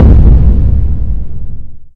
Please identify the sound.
Hard DP05
This is a heavy bass-drum suitable for hard-techno, dark-techno use. It is custom made.
kickdrum, boomer, bassdrum